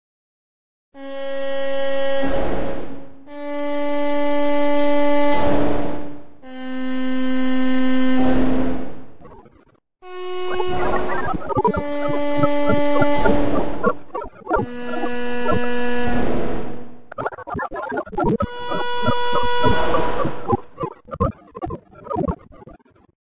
Experimentation with programs that i "Rediscovered". I didn't think these "New" programs were worthy of using, but to my surprise, they are actually extremely interesting to work with!
These are really some bizarre effects that were produced with the new programs.
///////////// Enjoy!